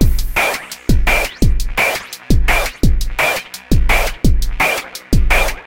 duppyD+B03 170bpm
Drum n Bass style heavyweight beat with squelchy synth snare and a thin synth bed